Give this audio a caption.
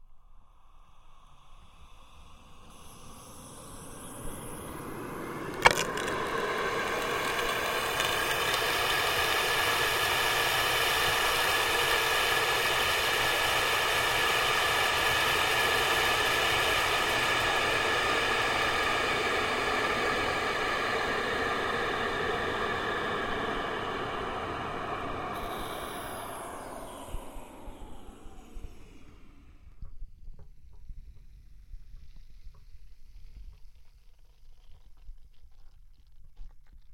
Slowly turning on the water to full and then slowly off again. This was recorded with a contact microphone and I was really amazed what it sounded like. Recorded with a Cold Gold contact mic into a Zoom H4, the mic positioned about one inch from where the water comes out.